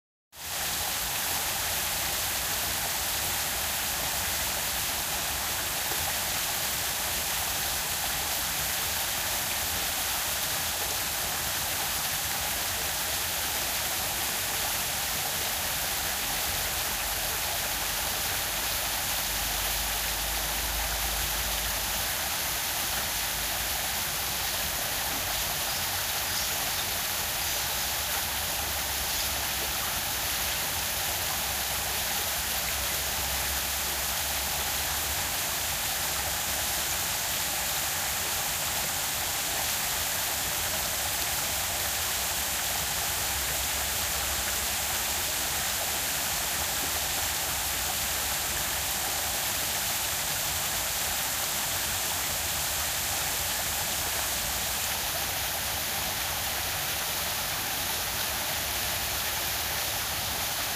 Recorded standing near a small waterfall in an Australian rainforest within a national park (Queensland).